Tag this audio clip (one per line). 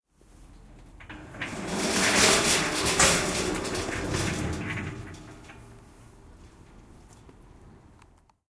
Ghent
SonicSnap
Stadspoortschool